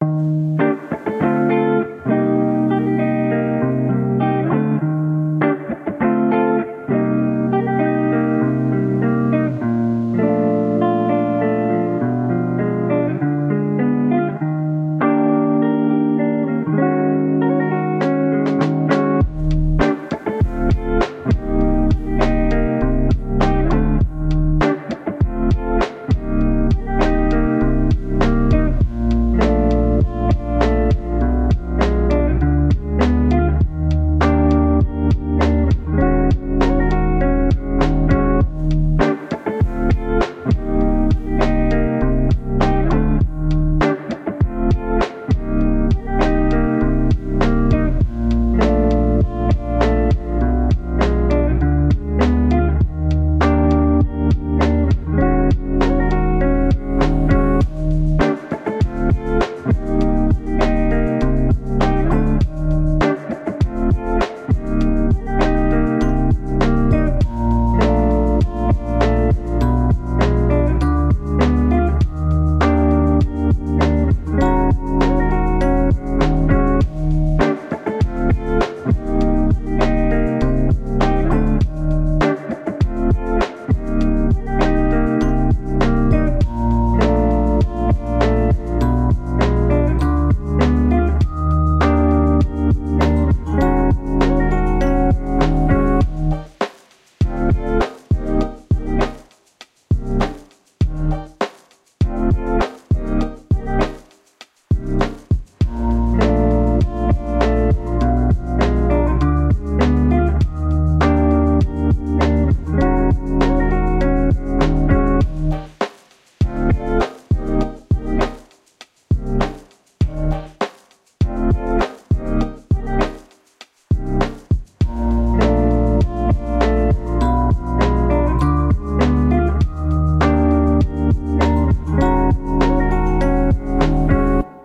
Lo-fi Music Guitar (Short version)
Track: 57/100
Genre: Lo-Fi
Been busy for a while because of my exams, now I'm back on track.
short, background, beat, synth, rhodes, guitar